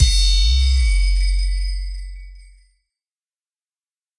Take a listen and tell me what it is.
A curious yet unnerving sounding hit intended to startle audiences.